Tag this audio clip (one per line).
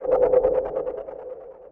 sound
audio
effext
beat
game
sfx
jungle
fx
pc
vicces